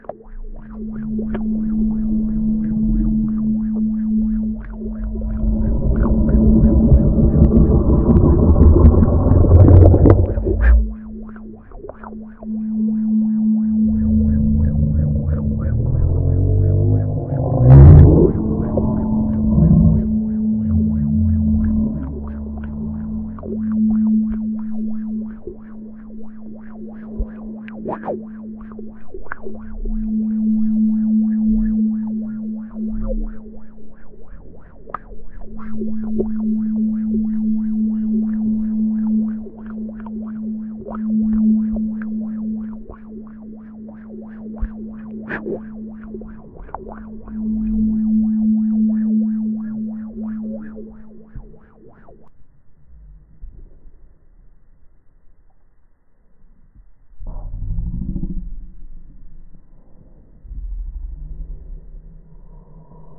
It's a sound of a magnetic portal and the rattle of a man at the morning, it's like if you are under water surrounded by strange creatures .
I put a strong echo and I used wahwah effect, reverse the track and slowed the speed.
Typologie de Pierre Schaeffer : continu complexe X
Analyse morphologique des objets sonores de Pierre Schaeffer :
1- Masse: Son "cannelés", mélange de nœuds (sons complexes) + et de sons toniques (on endend des "hauteurs")
2- Timbre harmonique: Acide
3- Grain: le son paraît rugueux
4- Allure: Allure vivante (Les oscillations sont mues par une périodicité souple et révélant un agent vivant)
5- Dynamique : Douce et graduelle
6- Profil mélodique: variation scalaire, avec une séparation nette
7- Profil de masse : premier son en continu, deuxième son avec différentes hauteurs